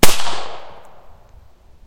Bryco Arms Model 38 - 1 shot
A TASCAM Dr-07 MkII stereo recording of the Bryco Arms Model 38, .380 ACP.
Recorded outside in a woodland environment. Here's a video if you like to see.
38, 380, 380acp, arms, bryco, fire, firearm, firing, gun, gun-shot, handgun, model, outdoor, pistol, shell, shoot, shot